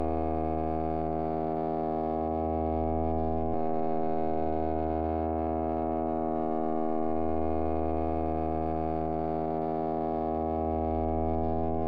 Canada Goose Expanded Pitched 2
A time expanded and pitched goose, sounds a little like a stringed instrument or some type of horn - weird!
canada-goose, pitch-shift, time-expansion